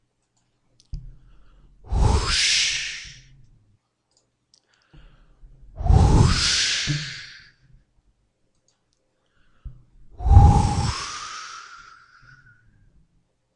Swoosh going from top to bottom, as if being sucked into something. Recorded this on Adobe Audition with my mouth and breath.